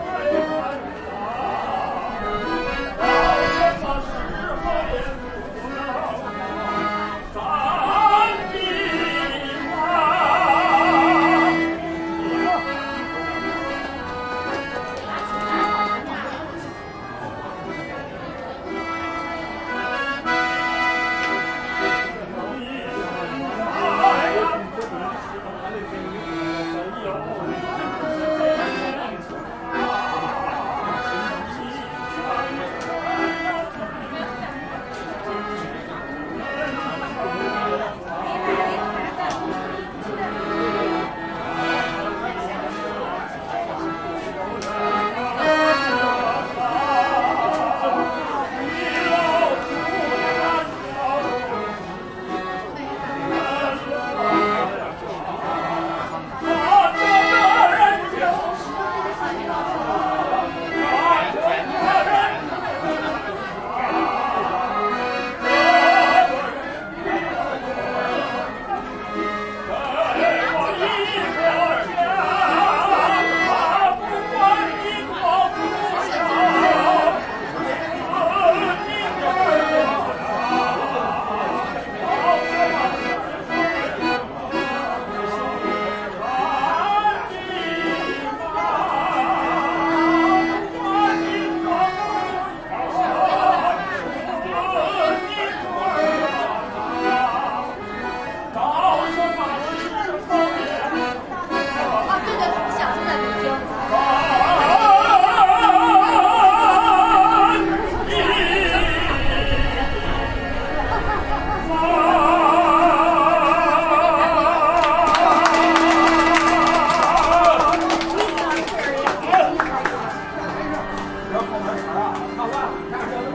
street musician singing with accordion outdoor tourist dining street Beijing, China
accordion, outdoor, street, tourist, China, singing, dining, musician